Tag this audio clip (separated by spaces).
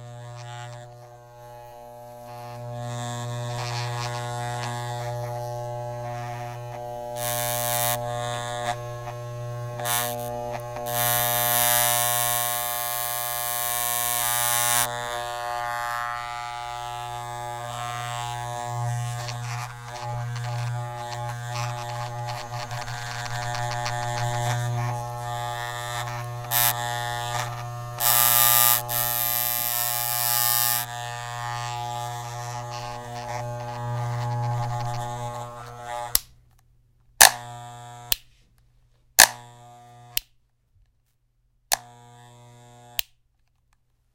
hum machine barber barber-shop cut buzz hair sharp